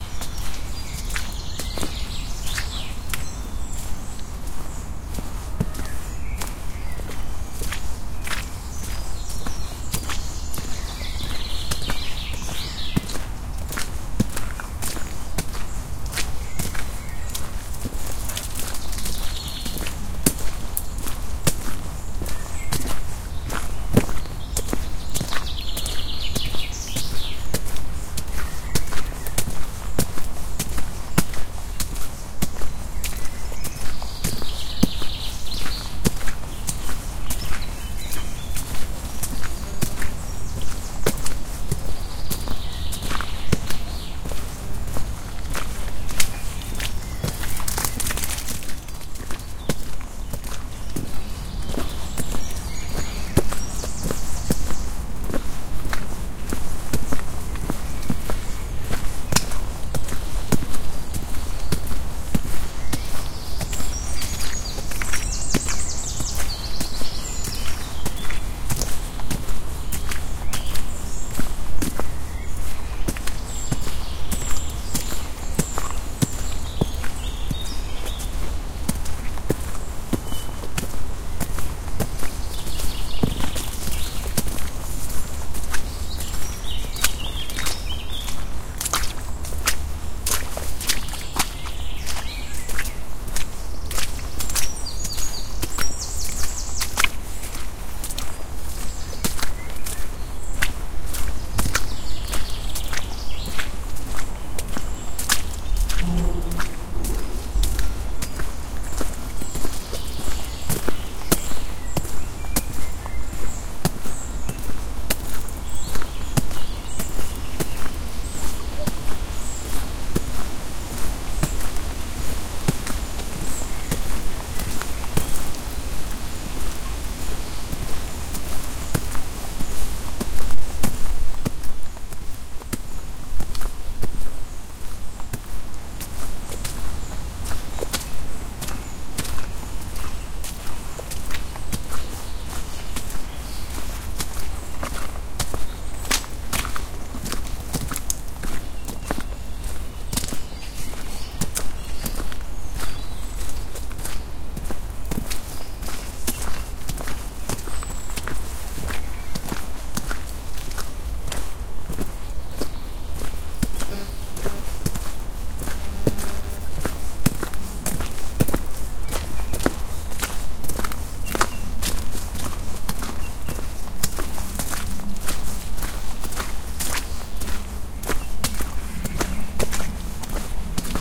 Footsteps walking through woods
Walking through Dawsholm Park in the woods on a drizzly, windy but warm day. Birds chirping in the background. Swampy underfoot steps in wellies. My Spaniel dog is scurrying about too. Recycling plant machinery can sometimes be heard in background